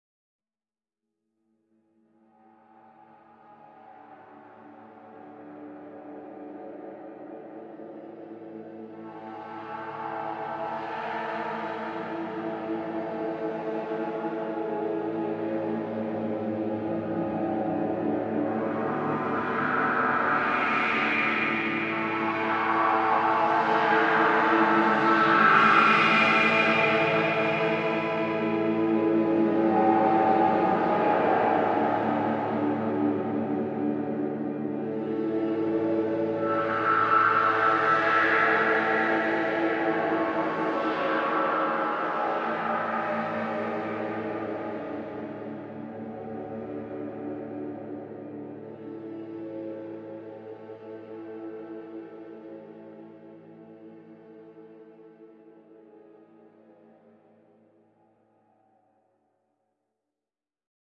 dark ambient guitar pad
created live on laney vc30 with telecaster, tc delay, logic verb, ernieball volume - Sound from a my 66 track